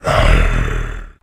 Sound of a wumpus roaring in a cave.
grue, wumpus, roar, monster, animal